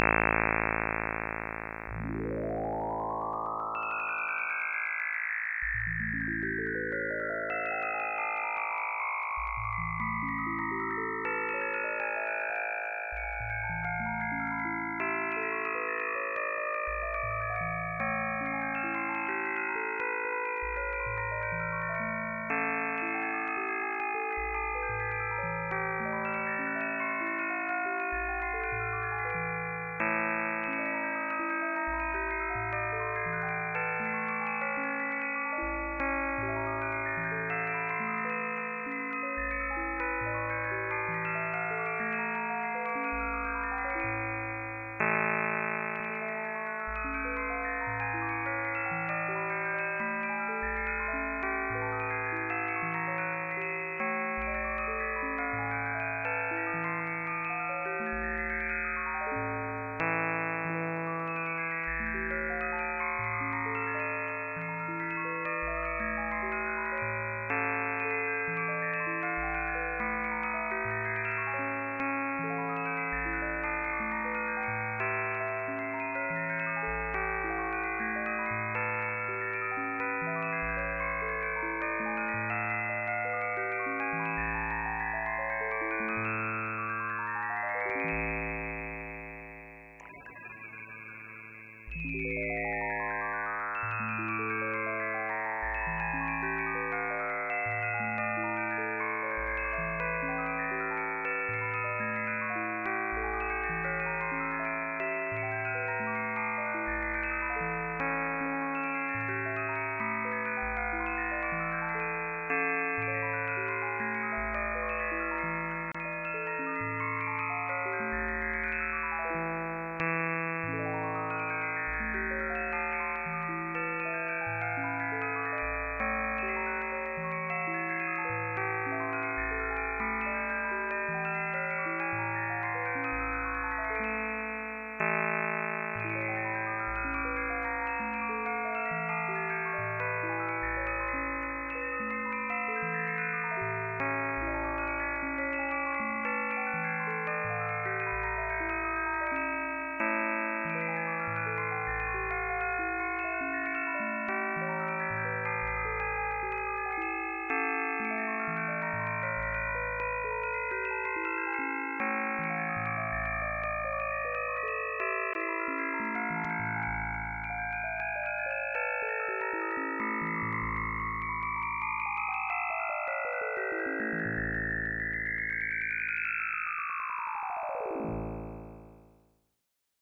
Whitney Music Box, variation 14.
First stereo variation. Two lines are used which are used to control panning.
Music generated using my own syd synthesis software.